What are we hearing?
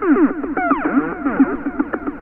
hf-7310 110bpm Tranceform!
Another very strange trance loop made with TS-404.
electro, odd, resonant, ts-404, weird